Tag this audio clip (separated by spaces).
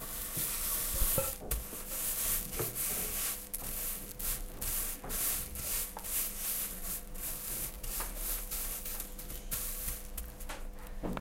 Escola-Basica-Gualtar mySounds Portugal